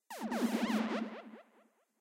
psy squeak zap
psytrance squeak
kinda lazer zappy
i made it on ableton
enjoy :]
psytrance, laser, futuristic, psychedelic